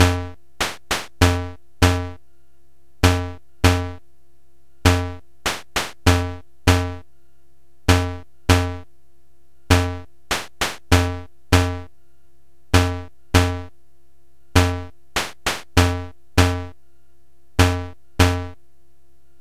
This came from the cheapest looking keyboard I've ever seen, yet it had really good features for sampling, plus a mike in that makes for some really, really, really cool distortion.
90, 90bpm, beat, cheap, drums, electronic, keyboard, loop, machine, slow, tango, toy